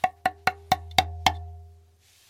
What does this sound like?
found djembe 2
Hitting a djembe on the edge many times